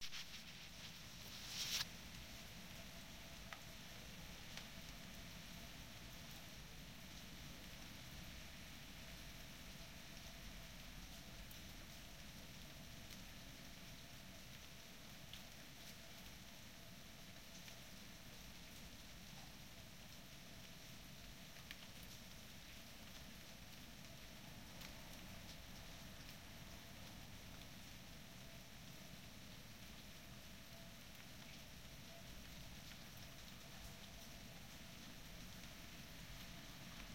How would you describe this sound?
the sound of the soft rain from a window.